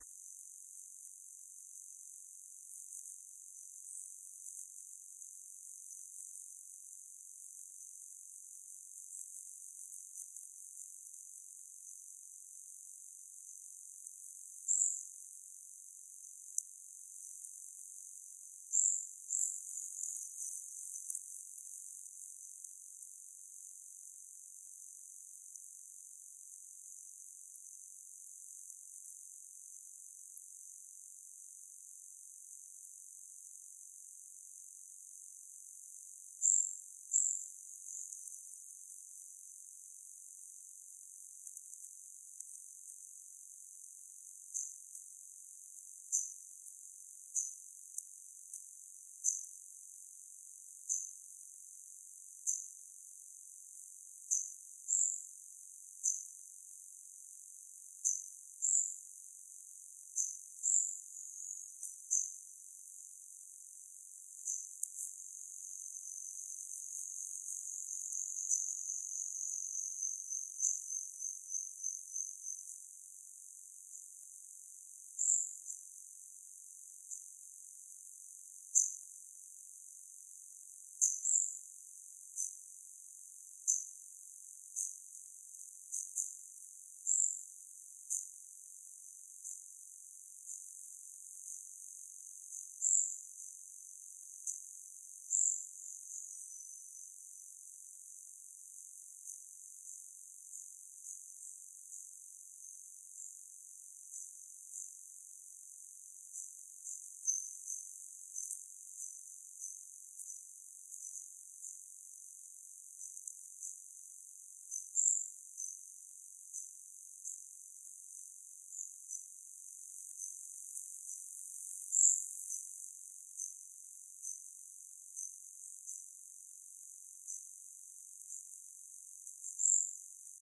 009 - fim de tarde, passáros, mosca, grilos (MID-SIDE)
Som captado pela aluna Bianca Martini durante as gravações do TCC “Adentro”:
“Uma mulher de 38 anos se muda para uma casa perto da floresta, isolada da cidade. Fugindo de um trauma, ela se estabelece nessa casa criando uma nova rotina, porém não domina conhecimentos sobre esse lugar, se amedrontando com alguns acontecimentos. Ela se vê solitária, vulnerável e introspectiva.
A floresta se faz presente para a personagem e acaba forçando-a a adentrar naquela mata para lidar consigo mesma, com o trauma e com a dolorosa jornada de libertação.”
O filme foi captado em uma região afastada de Campos do Jordão, numa casa em meio a mata, rios, cachoeiras e animais. O filme não possui diálogos e os sons ao redor tem grande importância narrativa. Nesta gravação ouvimos um ambiente da floresta no fim de tarde, com pássaros, moscas e grilos ao redor.
adentro, ambience, ambiencia, bianca, birds, crickets, flies, floresta, forest, grilos, martini, moscas, passaros, senac